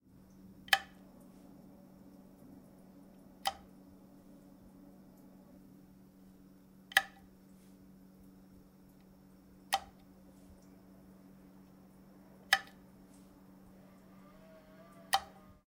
Me turning a light switch on and off.
flick, lightswitch, toggle, electric, button, electricity, off, electrical, light, switching, click, light-switch, switched, flip, push, switch, switches, mechanical, lights